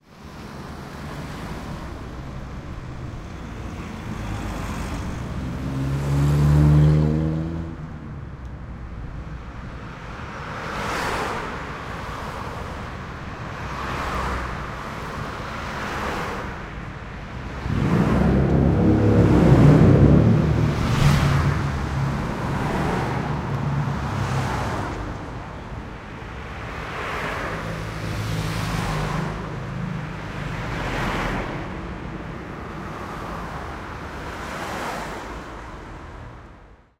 Cars Passing By
Waiting for the tram, cars driving along.
Recorded with Zoom H2. Edited with Audacity.
berlin, capital, car, cars, city, drive, driving, engine, exhaust, germany, humming, motor, speed, transportation, urban, waiting